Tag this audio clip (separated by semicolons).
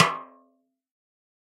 1-shot; multisample; drum; snare; velocity